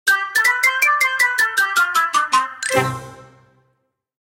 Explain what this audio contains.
Cartoon Game Zany Ending
Cartoon, Ending, Game, Zany